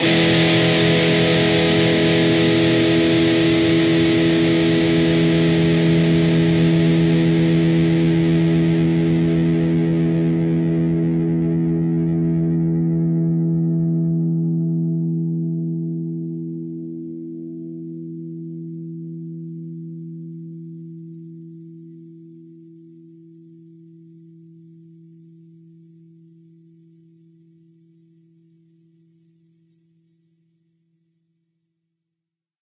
Dist Chr EMj
A (5th) string 7th fret, D (4th) string 6th fret, G (3rd) string, 4th fret. Down strum.
chords, distorted, distorted-guitar, distortion, guitar, guitar-chords, rhythm, rhythm-guitar